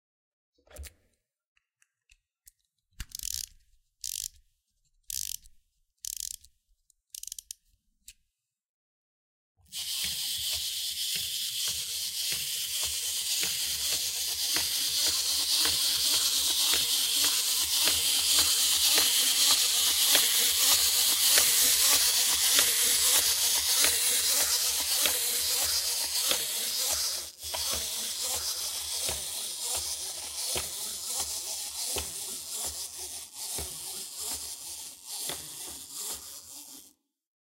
A clockwork Stegasaurus toy being wound with a key and walking across a desk.
clockwork, desk, mechanical-toy, mechanism, stegasaurus, toy, walk, wind, wind-up